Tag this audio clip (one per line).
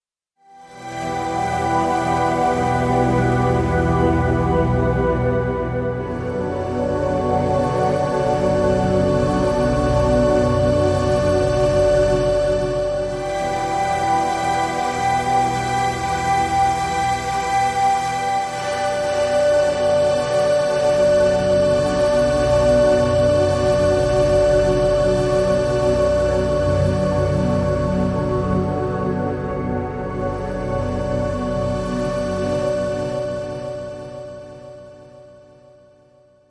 impulsion
electronic
background
rumble
fx
machine
drone
noise
hover
energy
ambient
effect
soundscape
spaceship
Room
space
ambience
drive
bridge
engine